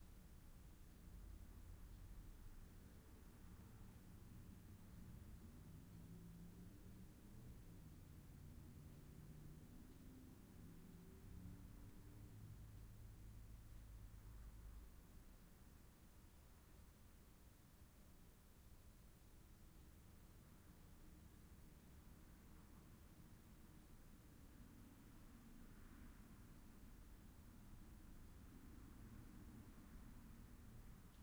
Studio room tone spaced pair
Room tone captured from a large studio using a spaced pair of mics. Light traffic can be heard through a garage door that's in the studio.
room, studio, tone